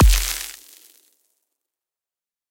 Impact Bass Crunch
A short kick generated from a sine wave topped with some layered sounds of crunching gravel I recorded.
Thank you!
crunch, bass, impact, crackle, kick, punch